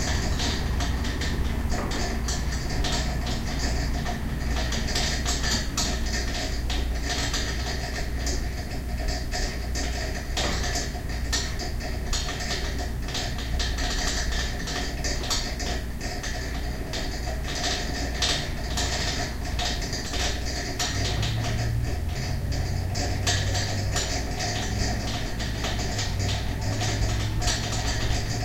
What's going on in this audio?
radiator noise
This was recorded in a small warehouse studio while the radiator was heating up the space. Used a Zoom H2. Very chaotic and noisy; interesting.